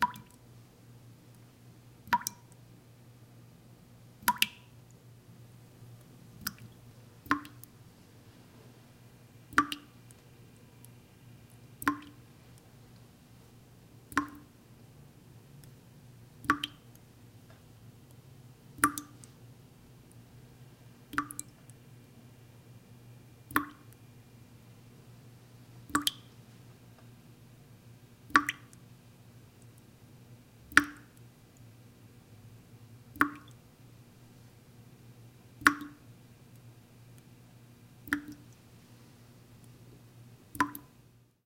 A folie I recorded for a short students movie I edited in 2011. I recorded these water drips with the Zoom N4's built -in microphones and it worked great for me, so I decided to upload it. Enjoy.
sing, leak, Water-drips